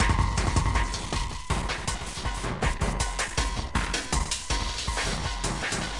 Drumloops and Noise Candy. For the Nose
acid, breakbeat, drumloops, drums, electro, electronica, experimental, extreme, glitch, hardcore, idm, processed, rythms, sliced